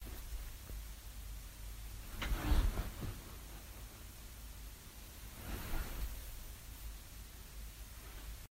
Sitting on bed
sitting on my mattress